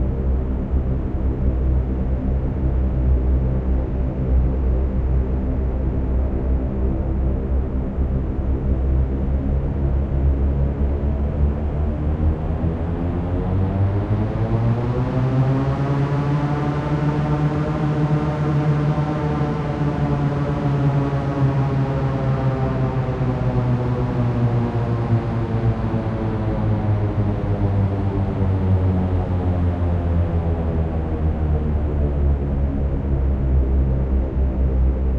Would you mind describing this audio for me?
Aircraft Dive Panned
aircraft, airplane, dive, plane, prop, propeller, request